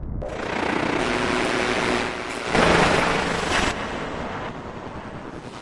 noisy drone sounds based on fieldrecordings, nice to layer with deep basses for dubstep sounds